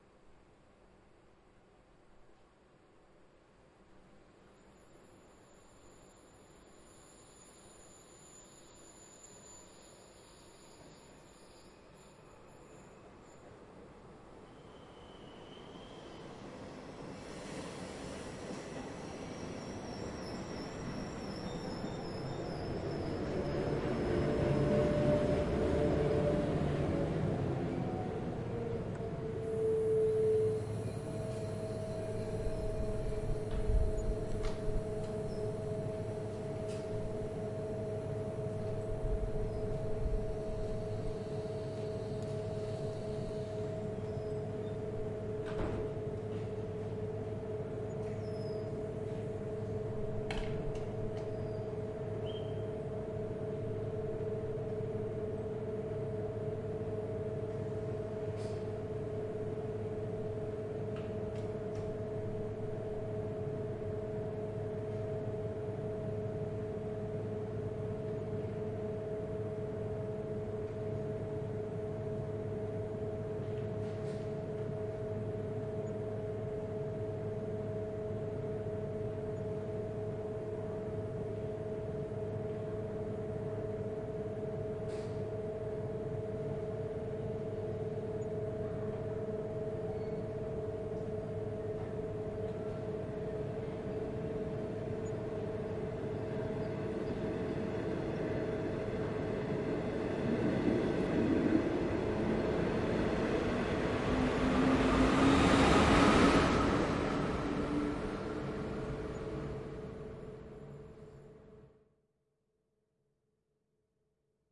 Swiss federal train departing station.
Recorded with Zoom H4N in 2014 in an almost empty train station in Sierre, Switzerland.
departure, train, train-station